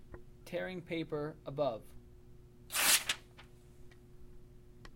Recording of tearing paper with the mic above